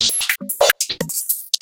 A pack of loopable and mixable electronic beats which will loop at APPROXIMATELY 150 bpm. You need to string them together or loop them to get the effect and they were made for a project with a deliberate loose feel.